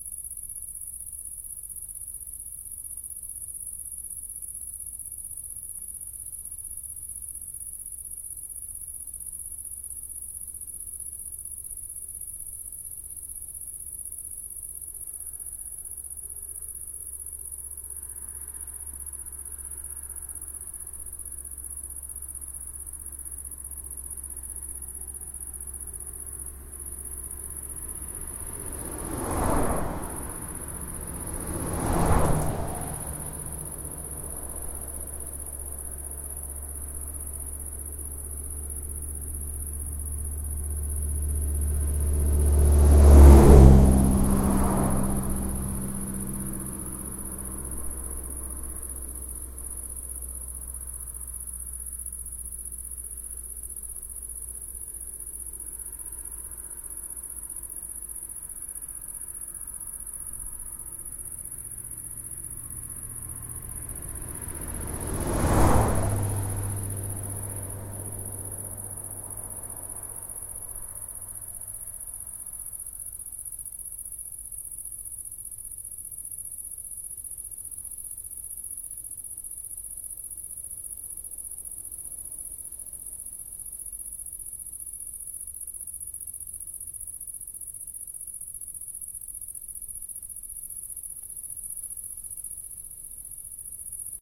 Landstraße - wenig Verkehr - L283 - 201107
Country road L283 in Germany (County Brandenburg). Less traffic.